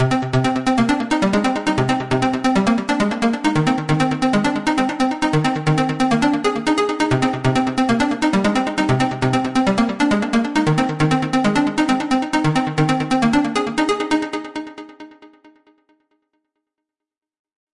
trance synth loop i wrote with a square wave patch with reverb and delay for added depth